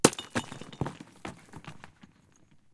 rock thrown off steep rocky cliff near Iron lakes just south of yosemite.